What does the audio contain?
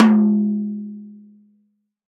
TT08x08-VLP-Hd-v09
A 1-shot sample taken of an 8-inch diameter, 8-inch deep tomtom, recorded with an Equitek E100 close-mic and two Peavey electret condenser microphones in an XY pair. The drum was fitted with a Remo coated ambassador head on top and a Remo clear diplomat head on bottom.
Notes for samples in this pack:
Tuning:
VLP = Very Low Pitch
LP = Low Pitch
MLP = Medium-Low Pitch
MP = Medium Pitch
MHP = Medium-High Pitch
HP = High Pitch
VHP = Very High Pitch
Playing style:
Hd = Head Strike
RS = Rimshot (Simultaneous head and rim) Strike
Rm = Rim Strike